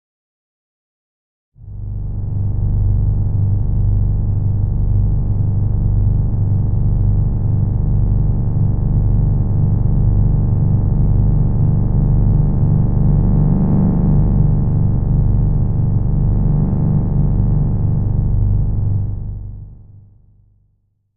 Zeppelin Motor
Synthesized sound of an engine + rudder, coming from left to right, with a spin in the middle.
engine, rudder, zeppelin